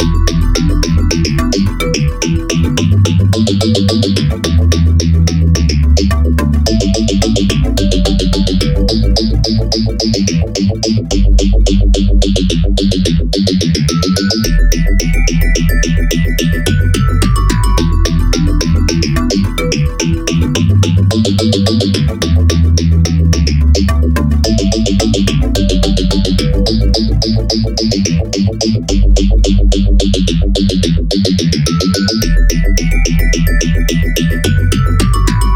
Short but effective jam loop. 108 BPM key of E minor.
jam, groove, minor, loop, 108, music, BPM, E